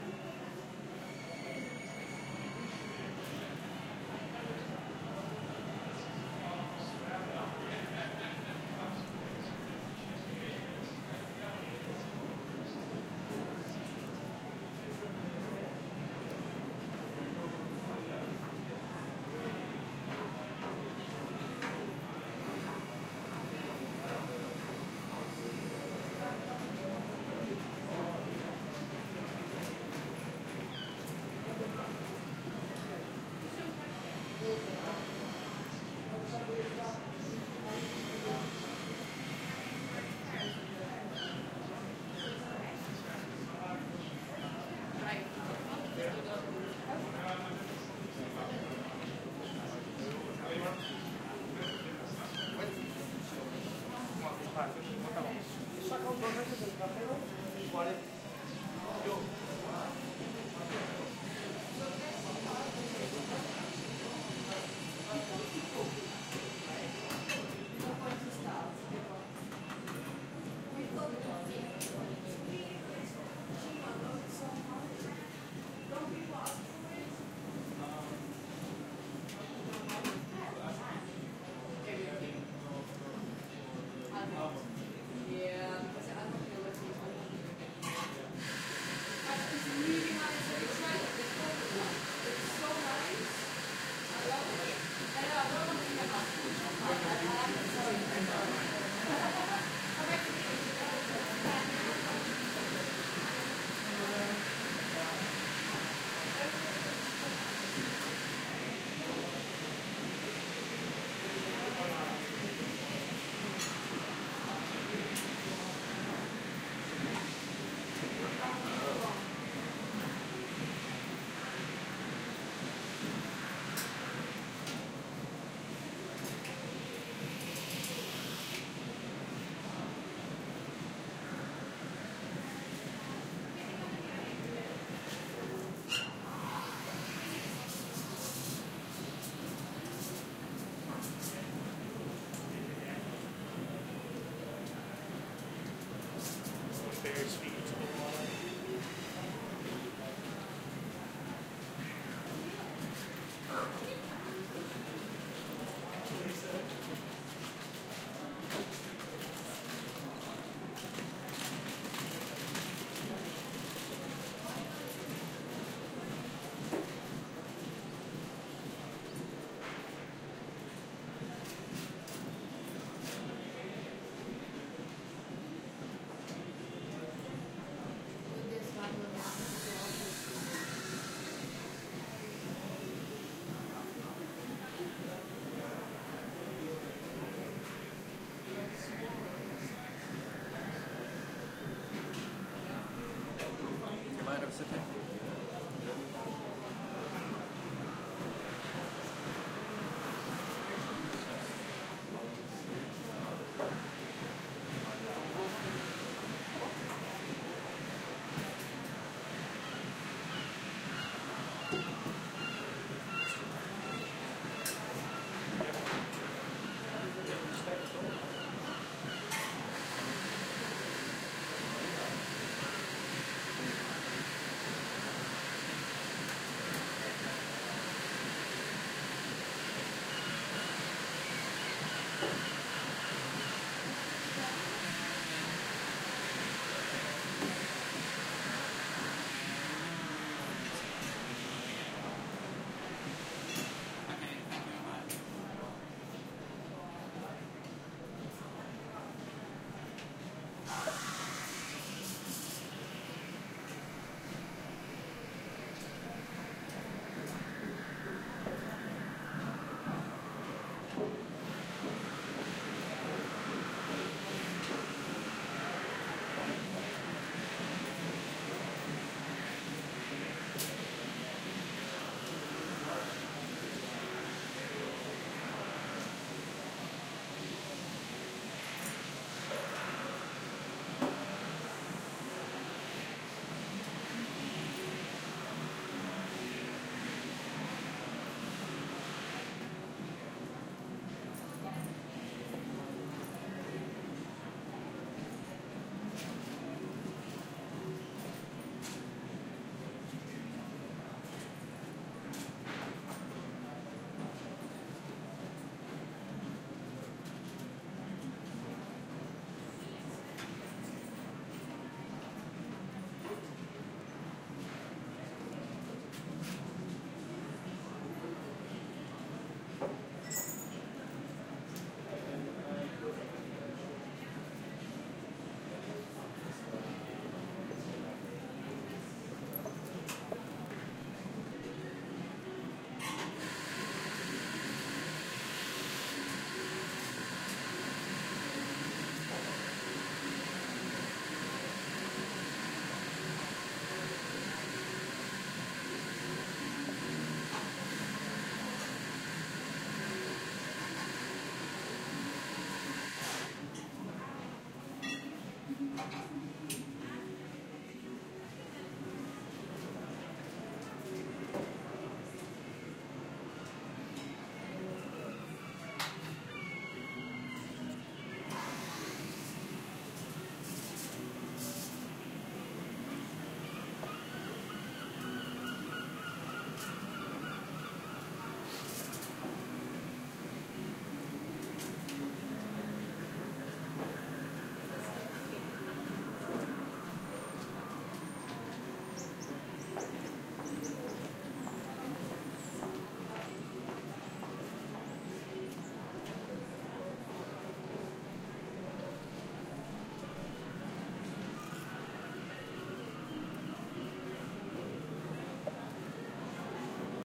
A field recording of Camden Market, London, England. Recorded with a Zoom H6 and cleaning up in post with Izotope RX.